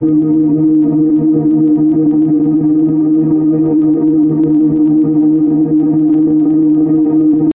A multi-layered warm sort of ambient loop I created.